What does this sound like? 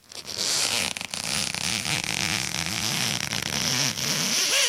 Creepy Shoe Sound